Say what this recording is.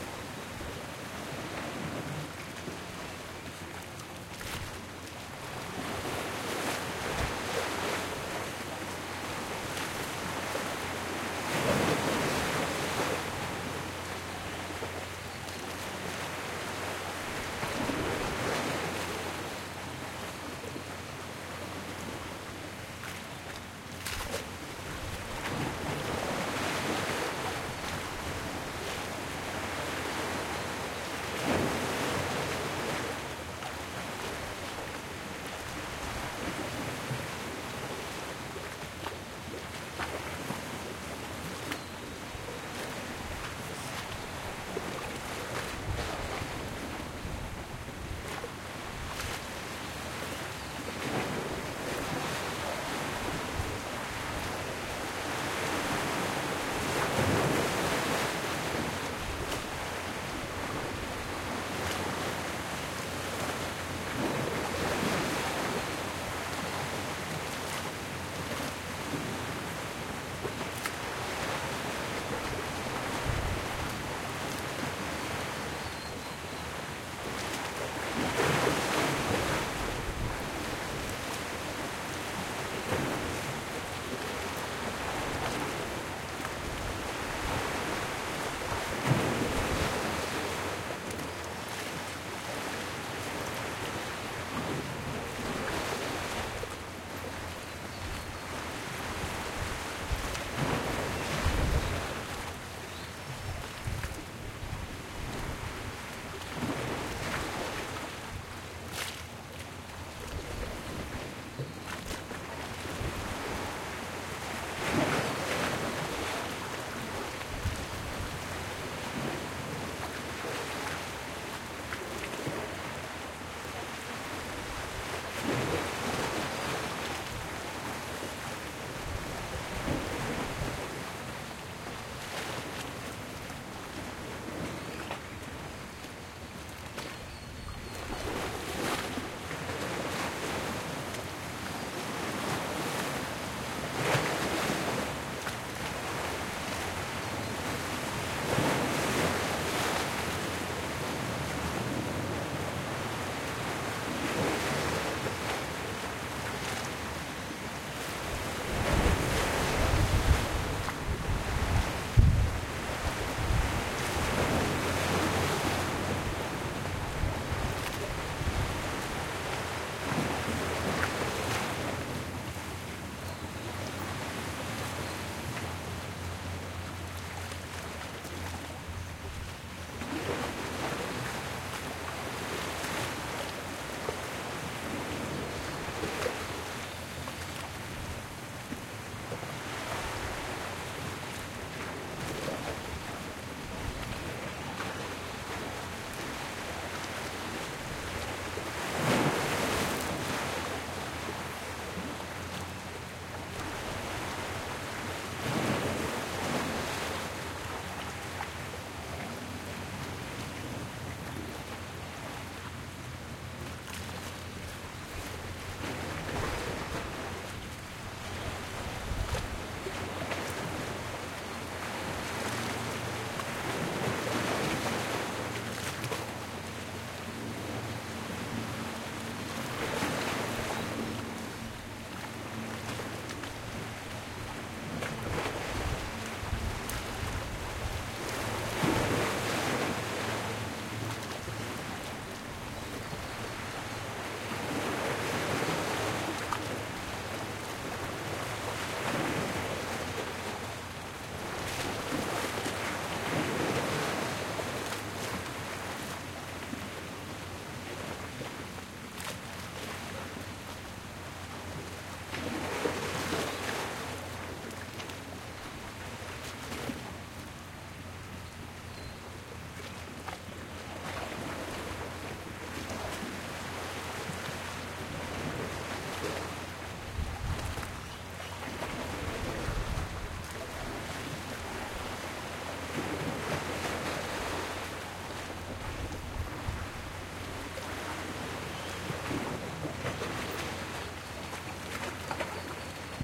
el cantil sunset
Gentle waves on Cozumel Island in Mexico. The sun is setting and the birds are starting to get active.
ocean, waves, birds, sea, field-recording